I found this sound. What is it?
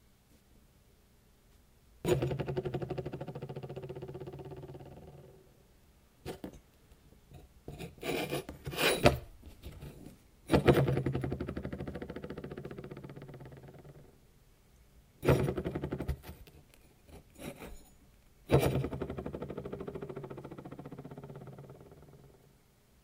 Spoon on Table
Spoon on a table
dishe, Spoon, Table